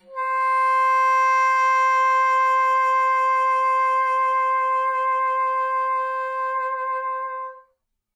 One-shot from Versilian Studios Chamber Orchestra 2: Community Edition sampling project.
Instrument family: Woodwinds
Instrument: Bassoon
Articulation: vibrato sustain
Note: C5
Midi note: 72
Midi velocity (center): 95
Microphone: 2x Rode NT1-A
Performer: P. Sauter